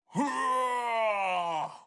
ARRGH MALE50 14
I uploaded this after I finish my job (for scoring a music and additional foley/sfx) and by the time for cleaning, i found some of them were not used, were not even reviewed. I have several unused items. As I have benefited several times from this website, it's time to give back. Why not.
This was recorded in my home studio, using my condenser microphone, the iSK U99 (Neumann knock-off, so the seller and a friend said to me; I wouldn't know however.) Powered by Apogee Duet Preamp and simple shock-mount, I hope this recording clean enough for many uses. I recorded in close proximity, hence the high frequency a bit harsh. No edit. No effect. Cheers.
APOGEE, STUDIO, ISK, FOLEY, RECORDING, DUET, HOME